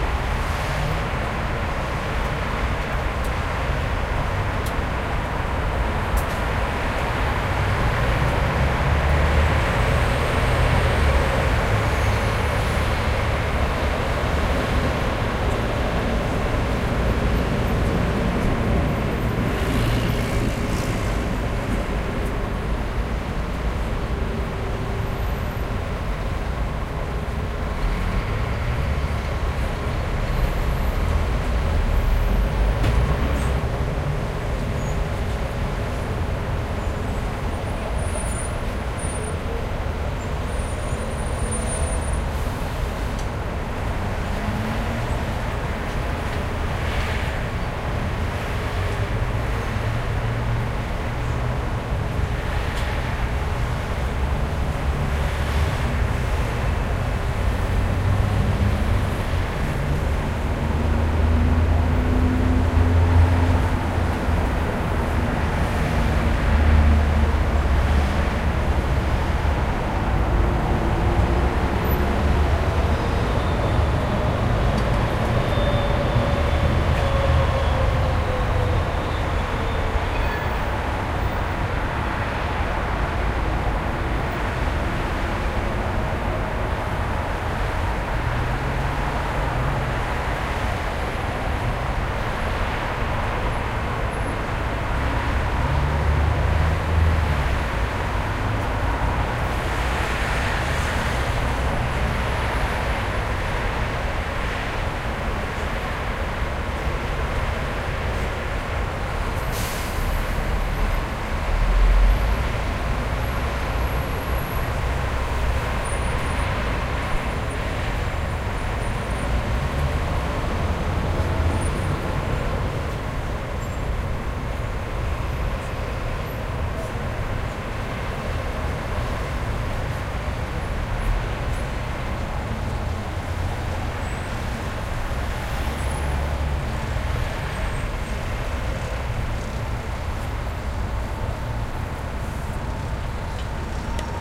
BS street traffic Warsaw Poland 2014-10-24
Ambience sounds of a street.
Recorded with Zoom H4n + Rode mic.